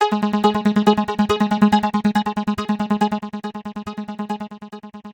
TR LOOP 0202
PSY TRANCE LOOP
trance, loop